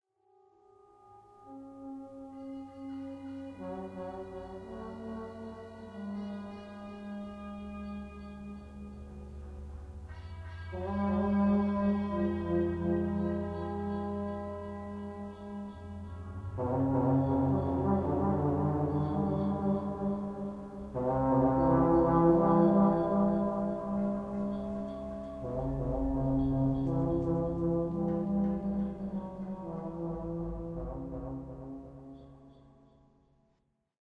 Ds.ItaloW.TrumpeterGirl.3
processed, sound, zoomh4